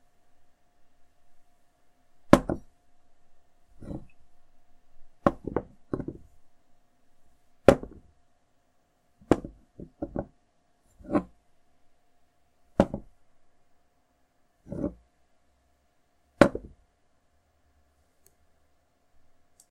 Glass on wood
A glass being lifted and placed back down on a wood surface.